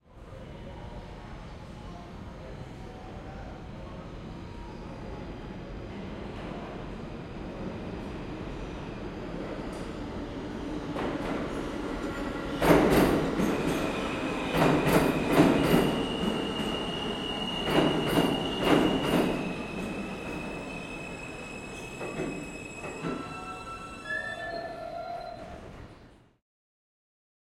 005-NYC subway platform, subway arriving
New-York, Train, Subway, Foley, Field-Recording, Metro, Arrive